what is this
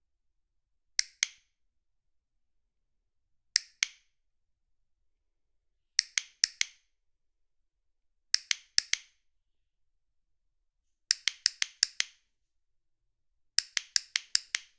Recoding of a small hand held metal and plastic clicker for training dogs.
Recorded in stereo with an Edirol R44 recorder and a Rode NT4 microphone.